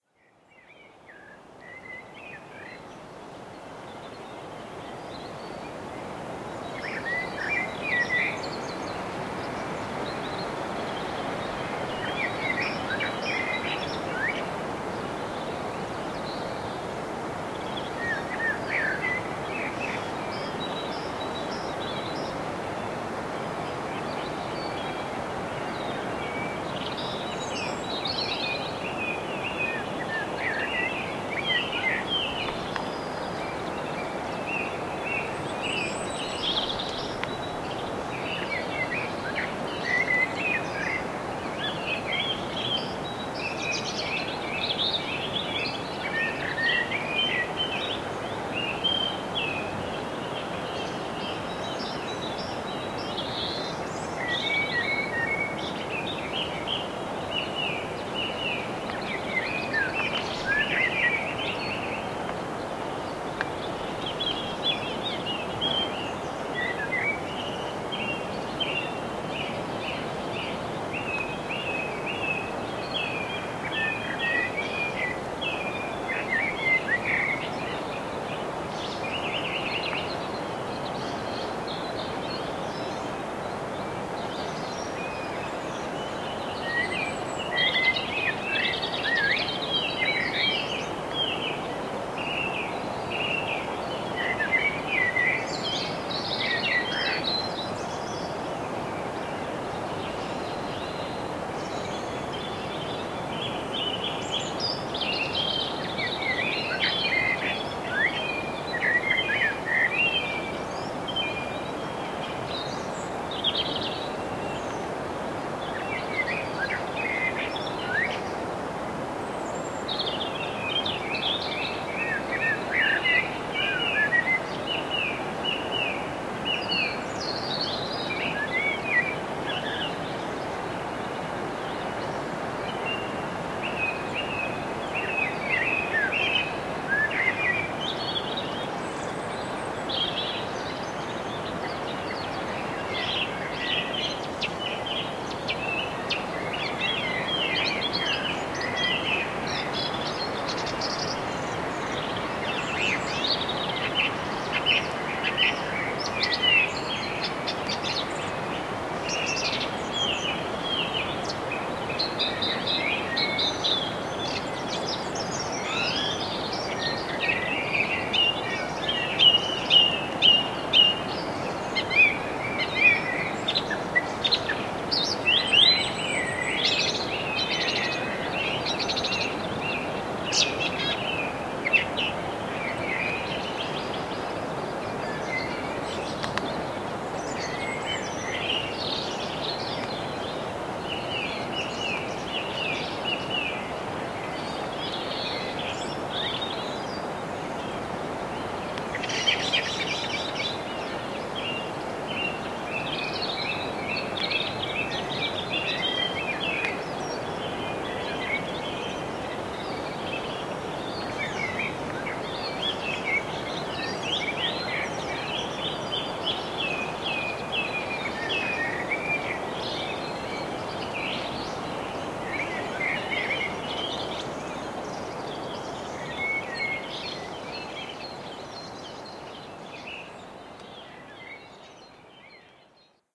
Blackbird&Starling
Blackbird and starling singing at dawn.
blackbird, dawn, bird-song, birds, starling